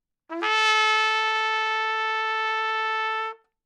overall quality of single note - trumpet - A4
Part of the Good-sounds dataset of monophonic instrumental sounds.
instrument::trumpet
note::A
octave::4
midi note::57
tuning reference::440
good-sounds-id::1375
Intentionally played as an example of bad-attack-bad-pitch-down
good-sounds, neumann-U87, single-note, trumpet, A4, multisample